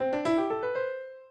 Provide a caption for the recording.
sound to notify winning
piano, scale, music